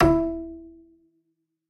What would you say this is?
Standup Bass Stop Bartok E4
This standup bass was sampled using a direct pickup as well as stereo overhead mics for some room ambience. Articulations include a normal pizzicato, or finger plucked note; a stopped note as performed with the finger; a stopped note performed Bartok style; and some miscellaneous sound effects: a slide by the hand down the strings, a slap on the strings, and a knock on the wooden body of the bass. Do enjoy; feedback is welcome!
Plucked, Stereo, Bass, Standup, Double, Upright, Instrument, Acoustic